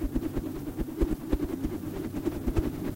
sound of a rope swung in front of a mic, pitch lowered